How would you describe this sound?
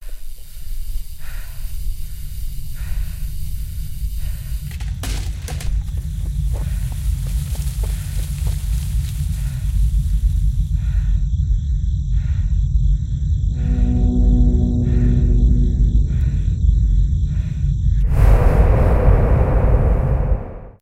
Alien Abduction Chase
Guy on bike is panting and then starts running through corn field and gets abducted by spaceship
alien, galaxy